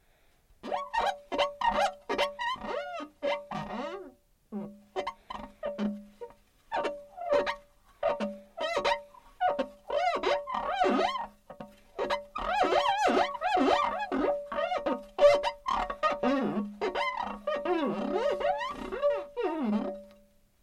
Glass /window cleaning
Foley of a dry window/glass door being cleaned, made with a finger rubbing the surface.
Mic: Schoeps C-MIT 5
cleaning, close, glass, window